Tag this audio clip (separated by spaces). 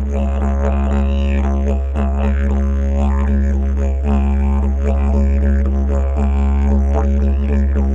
aerophone didgeridoo didgeridu didjeridu filler loop rhythm wind